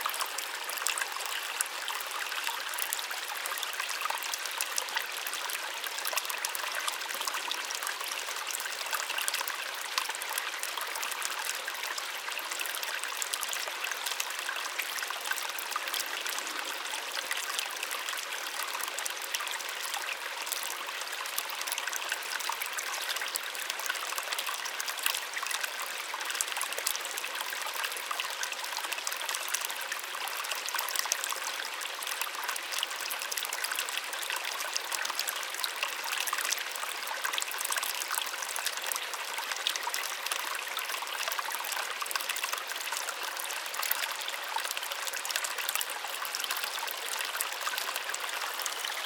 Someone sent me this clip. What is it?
Waterstream, small
A little brook with water as flowing.